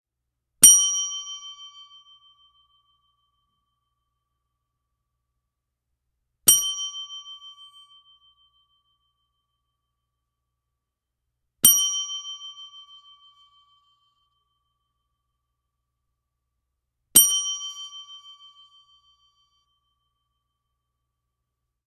Call Bell
call-bell, concierge-bell, counter-bell, reception, reception-desk, service-bell